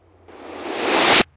Fade In Sound Effect(4)
Here is another fade in intro I made using by reversing a gun shot sound made by "klangfabrik" and I think it turned out better than I expected, I hope you guys enjoy it as much as I do.
Here's a link to the original sound that made this all possible:
Fade-In, Sci-Fi, Movie, Intro, Video-Game, Science-Fiction, Reverse, klangfabrik, Film, Video, Fade, Changed, FX, Edit, Introduction, Sound-Effect